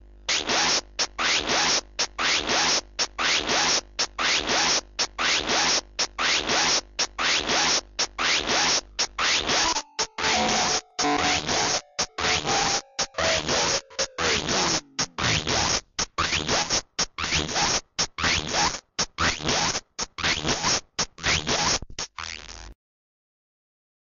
circuit bent keyboard
bent, circuit